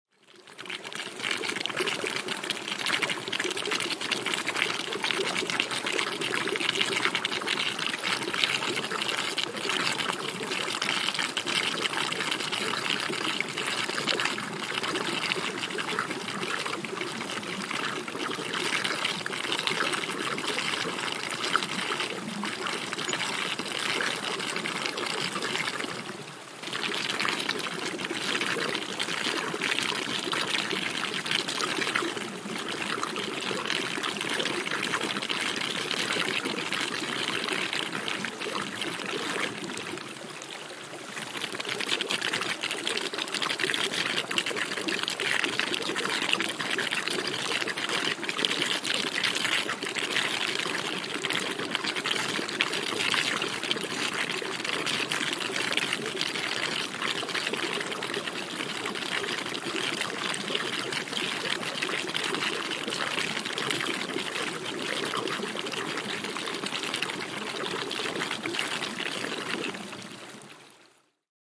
Fountain Reflux & Dropping Water
Fountain reflux recording, with Zoom H4
trickling, liquid, gurgle, creek, shallow, bubbling, meditative, flow, trickle, waves, relaxing, brook, fountain, babbling, river, water, nature, gurgling, ambient, field-recording